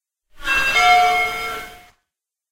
ElevatorChime.ThePrisoner(UK)
This is the arrival chime for an elevator in Japan. I believe it is very similar if not identical to one of the sound effects from the UK TV series "The Prisoner".
Recorded on SONY UX-80. Built-in stereo mics. Amplified. Leading and trailing silence forced. Audacity.